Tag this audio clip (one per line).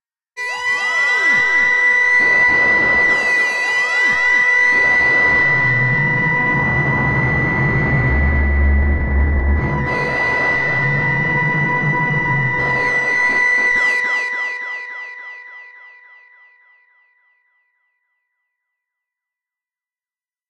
abstract
angry
apocalyptic
digital
effect
electronic
fiction
freaky
future
futuristic
fx
glitch
growl
machine
mechanical
noise
robot
scary
science
sci-fi
scream
sfx
sound-design
sounddesign
soundeffect
strange
synth
torment
tortured
weird